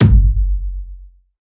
CRDN PNDLRGBT KICK - Marker #23
heavily pounding bassdrum originally made from 10 litre bottle punching sounds recorded with my fake Shure c608 mic and heavily processed by adding some modulations, distortions, layering some attack and setting bass part (under 200 Hz) to mono.
will be nice choice to produce hip-hop drums, or experimental techno also for making cinematic thunder-like booms